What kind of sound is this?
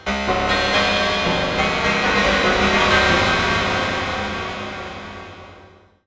Prepared Piano 1
Created in u-he's software synthesizer Zebra, recorded live to disk in Logic, processed in BIAS Peak.